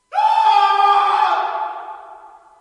Scream2 Hissy
Out of the series of some weird screams made in the basement of the Utrecht School of The Arts, Hilversum, Netherlands. Made with Rode NT4 Stereo Mic + Zoom H4.
anger, darkness, disturbing, fear, funny, pain, painfull, scream, screaming, yell